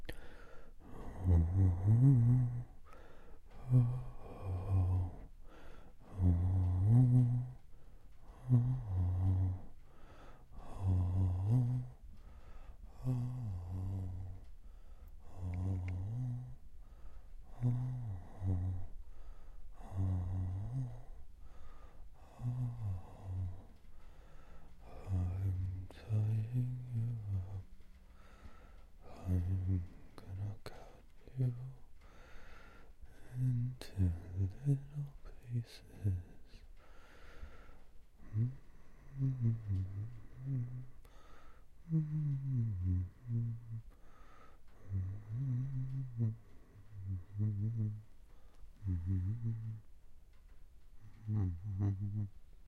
Creepy singing
A creepy person singing.